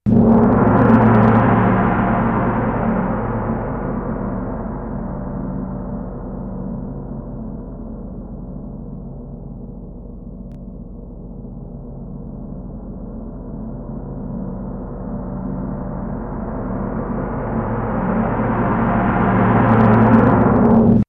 entrance gong
A loud gong strike suitable for a grand entrance